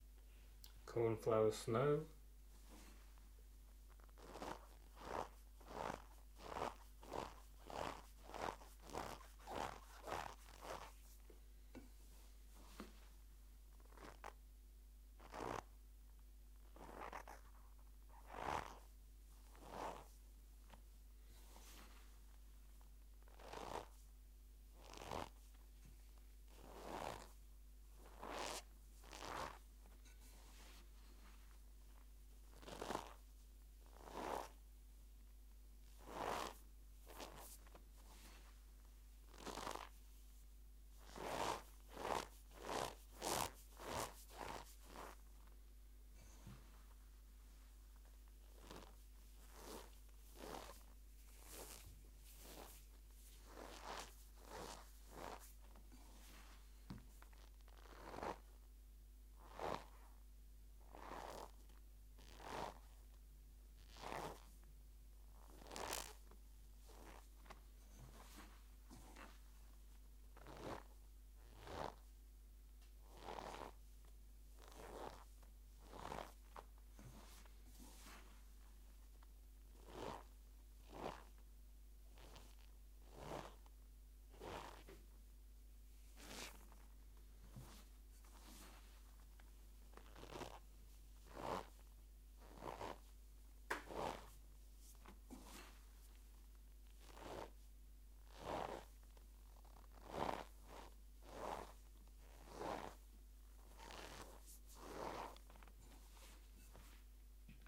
Cornflour Snow
Some snowy footstep sounds created using cornflour. Recorded with an ME66 into a DR40.